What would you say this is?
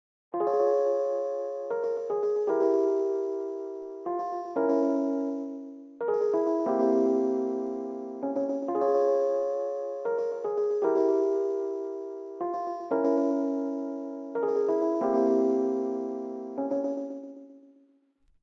Lofi E-Piano
Little Piano melody I made for a track.
Has echo which is bitcrushed, and some "Izotope vinyl" plugin slapped ontop of it.
115 BPM and F minor harmonic.
Enjoy!
bitcrush, chords, E-piano, lofi, melody, piano